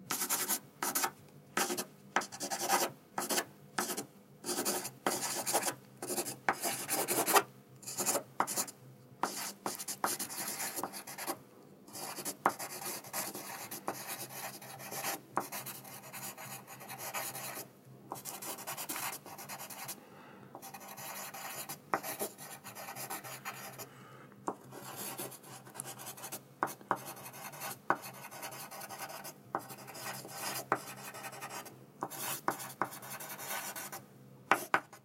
pencil write
write, Pencil, paper, writing, drawing